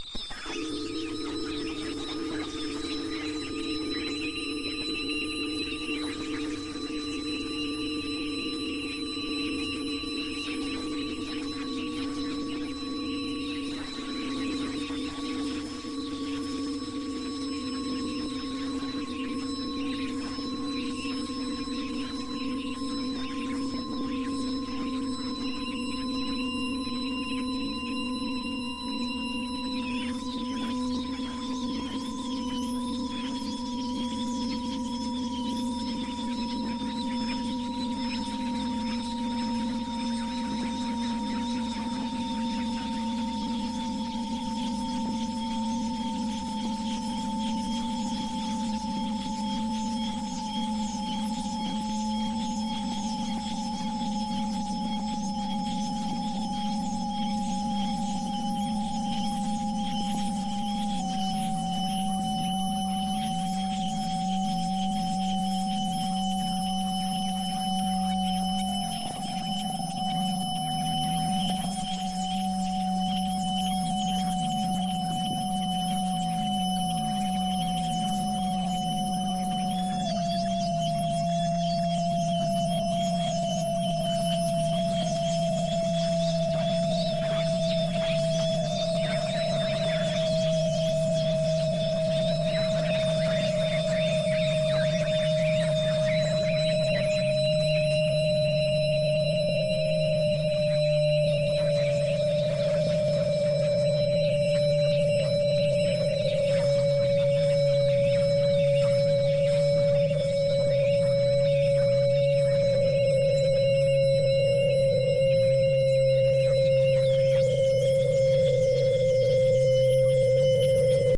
Sounds that I recorded from machines such as tyre alignments, hydraulic presses, drill presses, air compressors etc. I then processed them in ProTools with time-compression-expansion, reverberation, delays & other flavours. I think I was really into David Lynch films in 2007 when I made these...
field, processed, recording